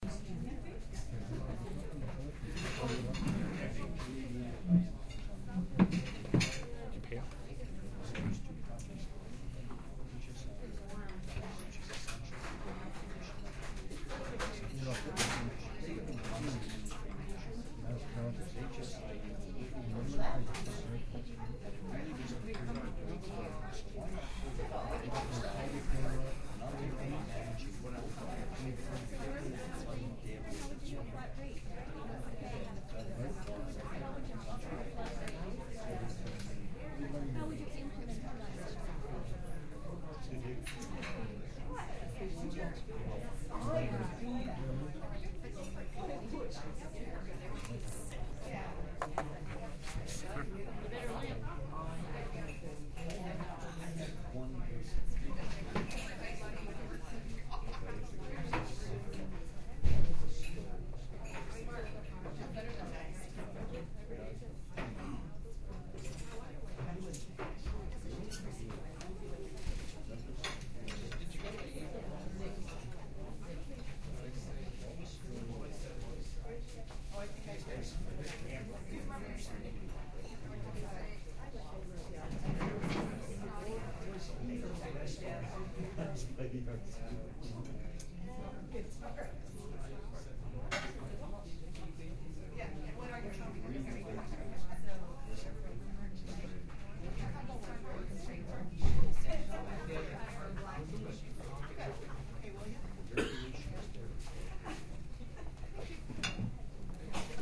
Binaural stereo recording of a small restaurant. People eating and chatting.